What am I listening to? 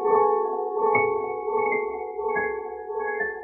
dist piano fragment 4
My Casio synth piano with distortion and echo applied. An excerpt from a longer recording.
distortion, piano